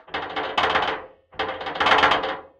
Rattling Metal Cabinet Door 5
Recording of me rattling an old metal filing cabinet door.